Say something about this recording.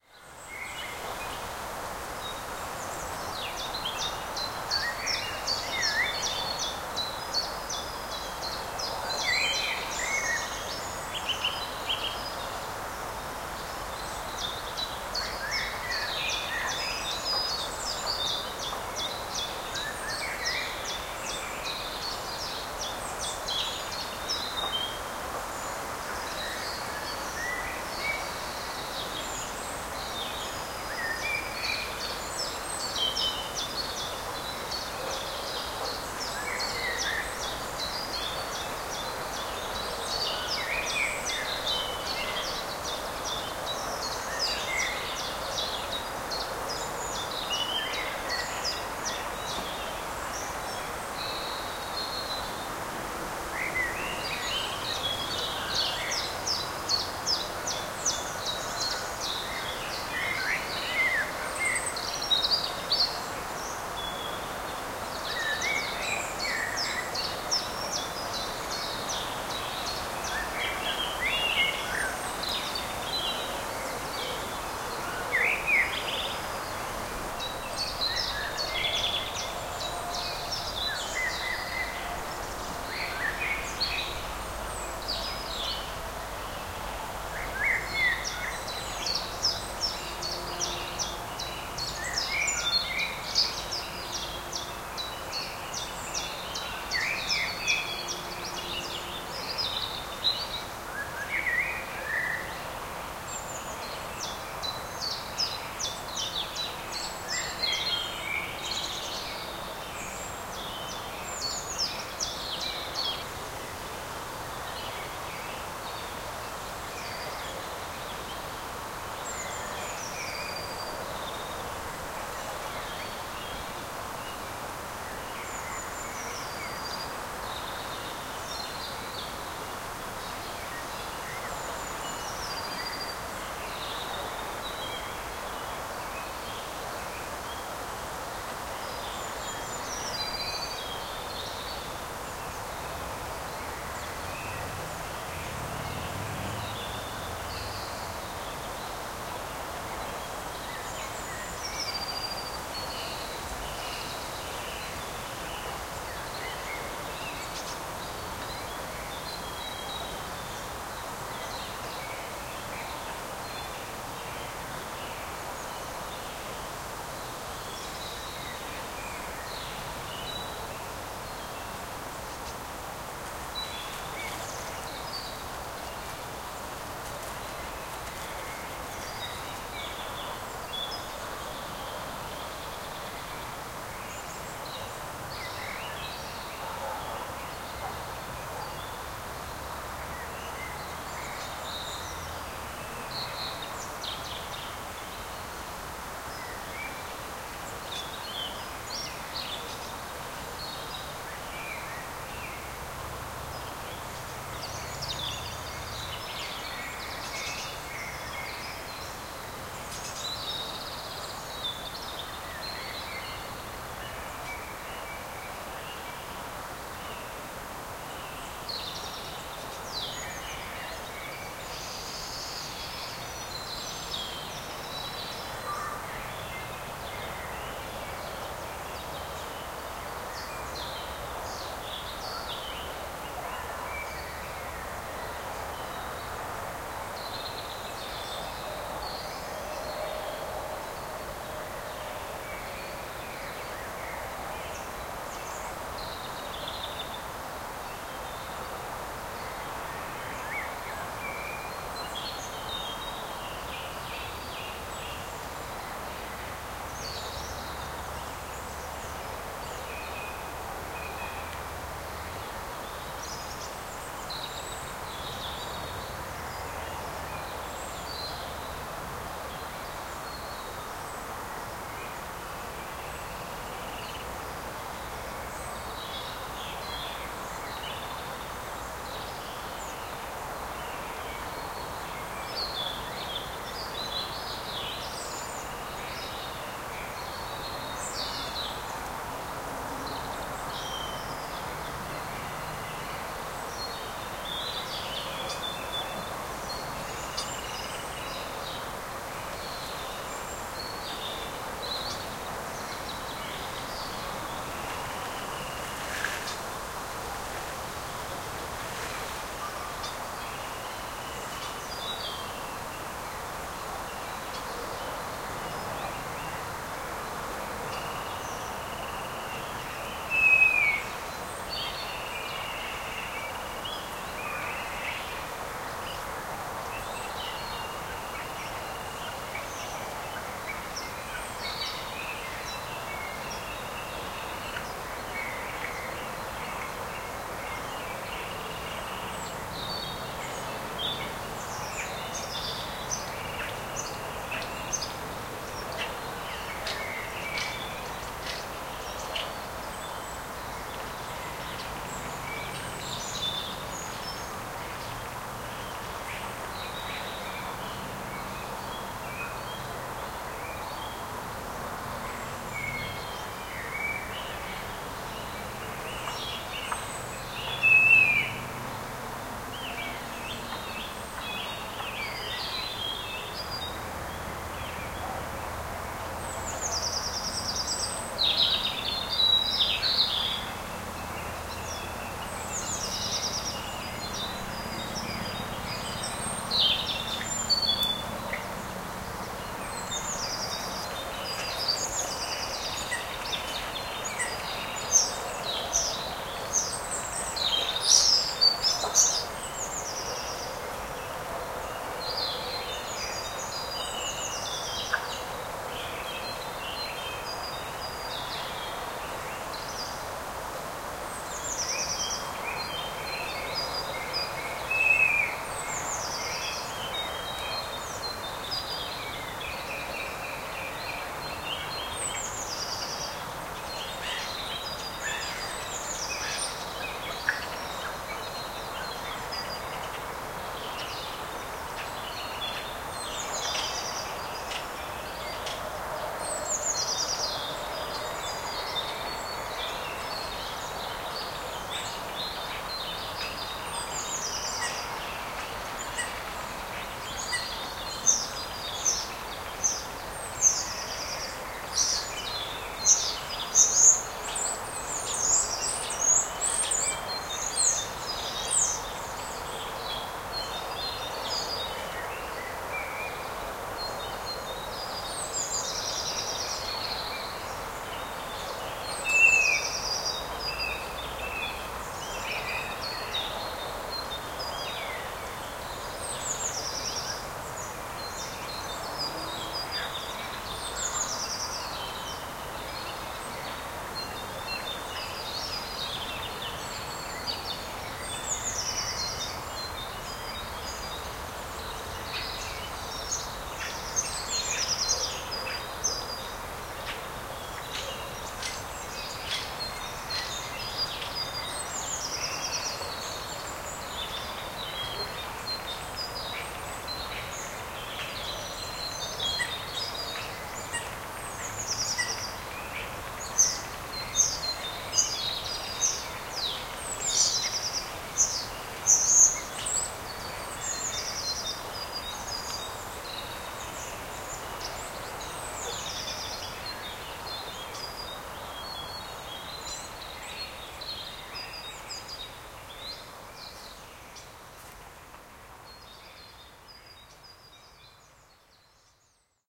Forest environment: Lots of birds and some village sounds in the background.